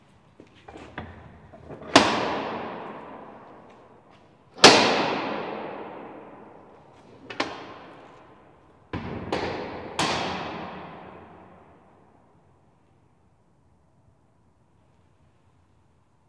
Cell Door
Sounds recorded from a prision.
slam, open, doors, lock, close, cell, metal, opening, prison, shut, door, closing, gate